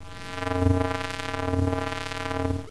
Whirling buzzes
8-bit, arcade, buzzing, chip, chippy, decimated, game, lo-fi, machine, retro, whirling